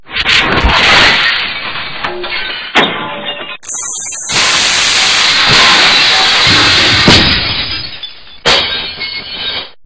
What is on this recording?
Glass riot mixdown Fresnd ud
Glass breaking effects mixed down in Audition v.3
Otherwise I'll be taking it down permanently very soon
fx, effects, Sound-Effects, synthesis, media